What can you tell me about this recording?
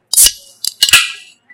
sound of a coke can opening
coke, soda, open, can